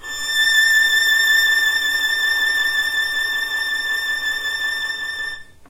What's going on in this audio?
violin arco vib A5
violin arco vibrato